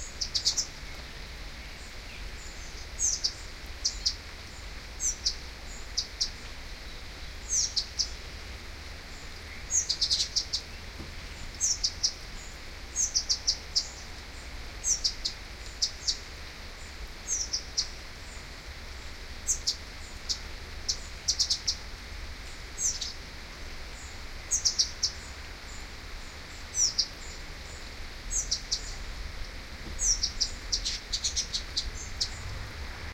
In our garden, this time for the first time, some Spotted Flycatchers
had their nest, using a swallows nest from last year(which made them
build a new one).
The "parents" were feeding two chicks and this recording is pretty much their birdsong.
The recording was done with my Sony HiMD MiniDisc Recorder MZ-NH 1 in the PCM mode and the AEVOX IE-M stereo microphone.
binaural, bird, birdsong, field-recording, flycatcher, nature, spotted